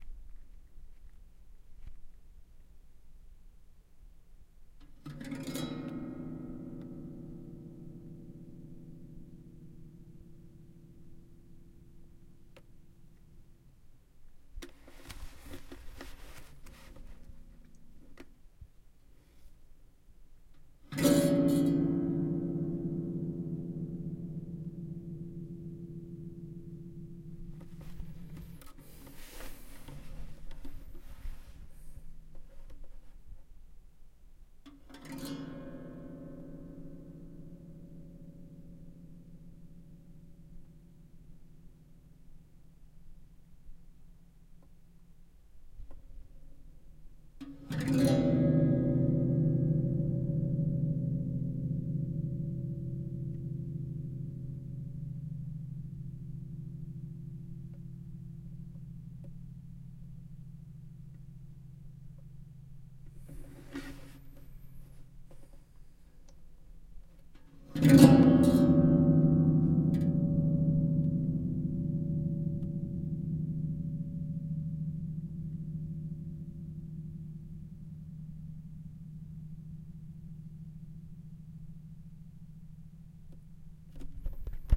Creepy Guitar Strum
An out-of-tune guitar being strummed. Useful as a spooky texture/stinger.
Just curious!